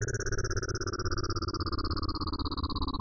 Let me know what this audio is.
Sequences loops and melodic elements made with image synth. Based on Mayan number symbols.
loop, sound, space